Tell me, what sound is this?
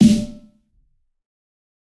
This is a realistic snare I've made mixing various sounds. This time it sounds fatter